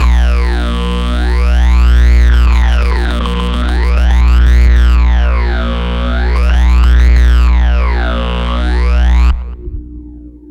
Recorded with a guitar cable, a zoom bass processor and various surfaces and magnetic fields in my apartment. Heavy duty ear crunching cyber growl...